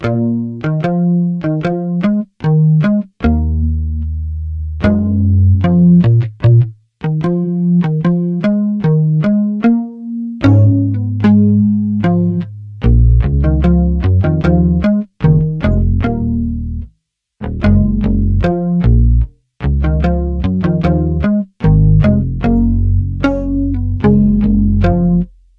Fairly real sounding bass guitar loop